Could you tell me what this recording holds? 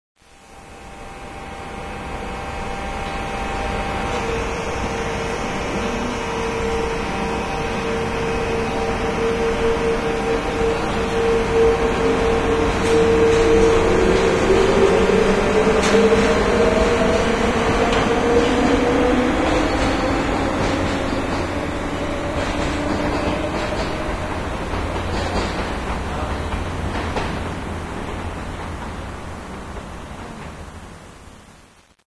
dutch train leaving
A dutch electric train is leaving, running engines.
dutch, engines, field-recording, railway, train